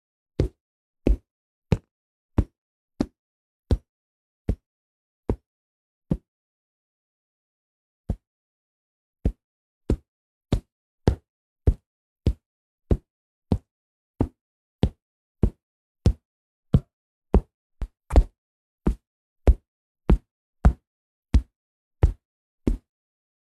Pasos completamente limpios, sin ruidos de fondo ni cosas extrañas.
Clean sound of footsteps with shoes, no background noises.
Recorded with my Zoom H4n